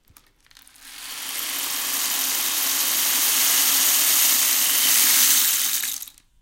RAIN STICK B 009
This sample pack contains samples of two different rain sticks being played in the usual manner as well as a few short incidental samples. The rain stick is considered to have been invented in Peru or Chile as a talisman to encourage rainfall however its use as an instrument is now widespread on the African continent as well. These two rainsticks were recorded by taping a Josephson C42 microphone to each end of the instrument's body. At the same time a Josephson C617 omni was placed about a foot away to fill out the center image, the idea being to create a very wide and close stereo image which is still fully mono-compatible. All preamps were NPNG with no additional processing. All sources were recorded into Pro Tools via Frontier Design Group converters and final edits were performed in Cool Edit Pro. NB: In some of the quieter samples the gain has been raised and a faulty fluorescent light is audible in the background.